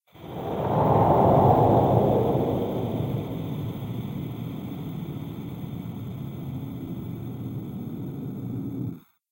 A soft demonic growl with some faint static and distortion at the end.